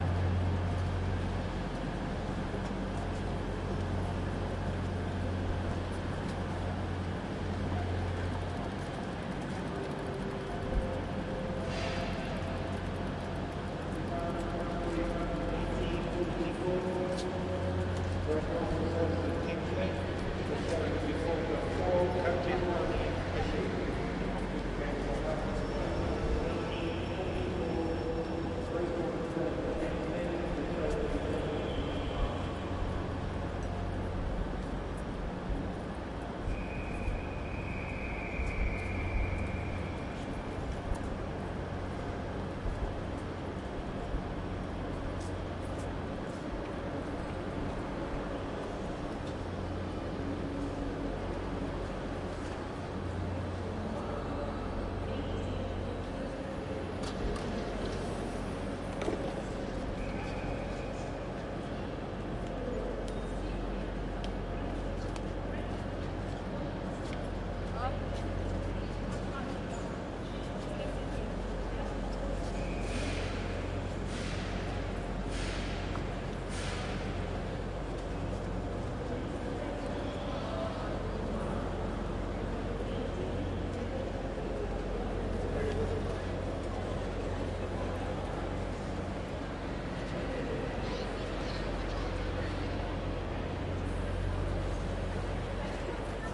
King's Cross staion platform atmos
Recording of a typical train station platform in UK.
Equipment used: Zoom H4 internal mic
Location: King's Cross Station
Date: July 2015